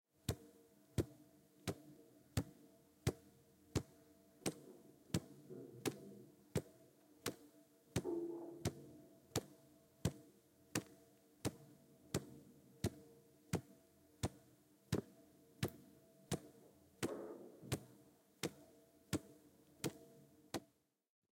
20170101 Water Dripping in the Shower
Water dripping in the shower, recorded with Rode iXY.
drip, dripping, liquid, shower, water